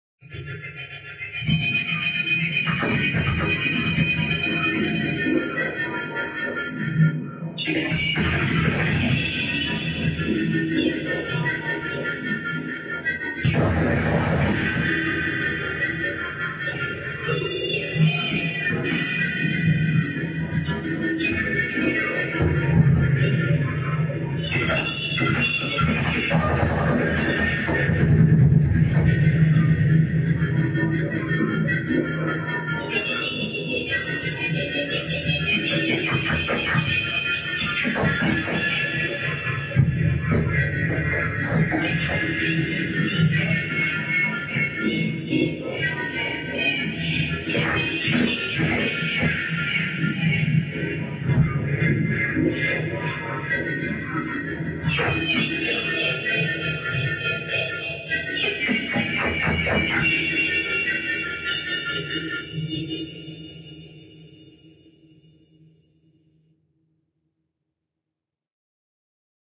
Again a feedback loop generated by a mixer and a Boss digital delay
stompbox and recorded in my studio using a SM57 and Minidisk. This
soundfile was first processed using DFX Geometer. After that I rearranged the file copied some fragments and dubbed some parts. Added some wah effects and used the Spinner2 plug-in, to create the shifting spin rate. At the end added some reverb.
The result is a splashy and whirling soundfile. There's a splashing and
wave-like pattern with whistling, wooshing and watery sounds.